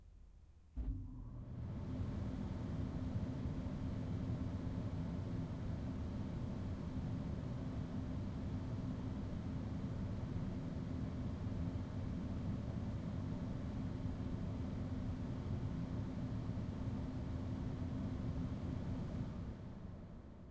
Stereo Recording of An Air Conditioner
Noise, Air-Conditioner